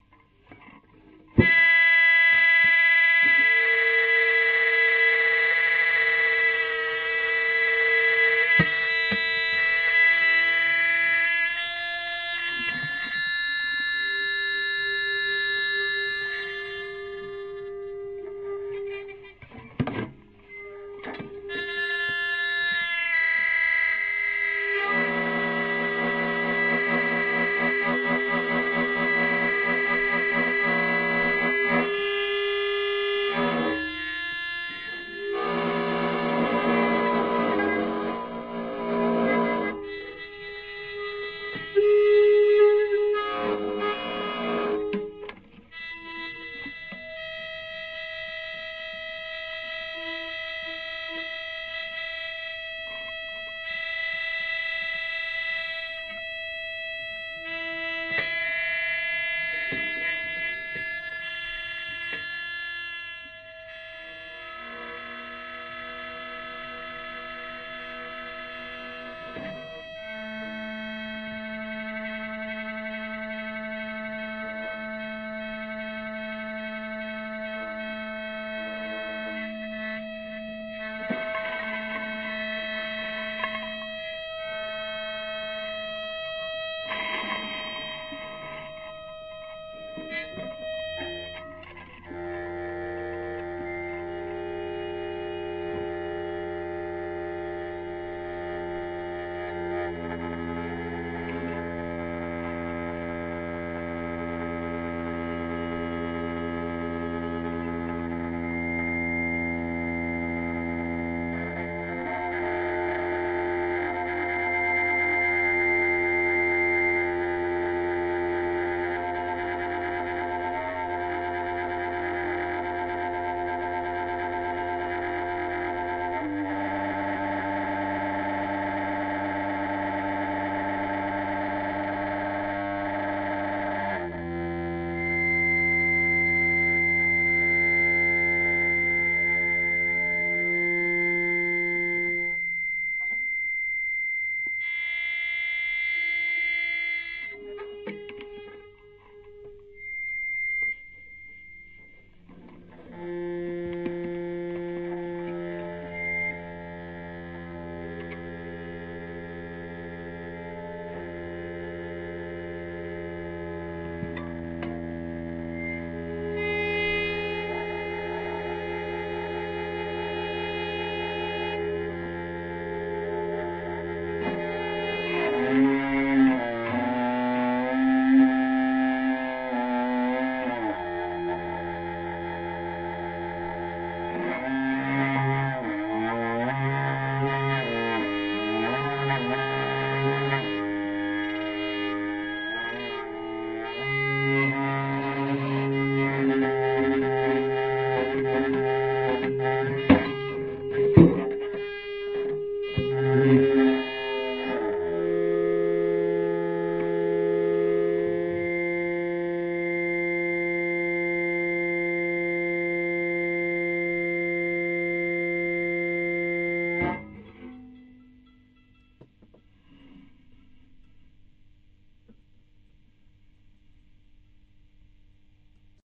Recording of prepared guitar solo, pretty lo-fi.